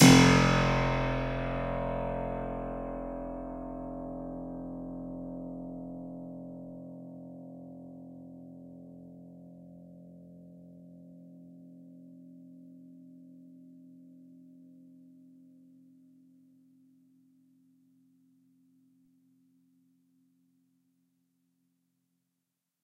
Harpsichord recorded with overhead mics
stereo, instrument, Harpsichord